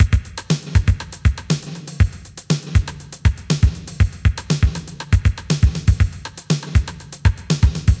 A TR 707 drumloop with FX reverb, 80's like, 120 Bpm